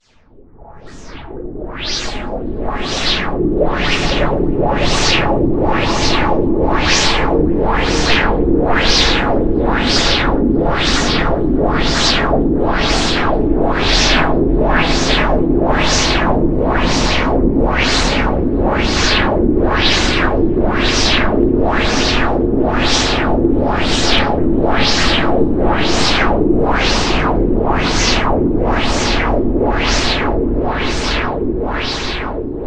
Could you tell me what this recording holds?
Noise Cache 9
Another weird sound made by "wah wah-ing" and echoing Audacity's noise choices.
alien, bass, space, noise, water, weird, horror, sci-fi, psychic, death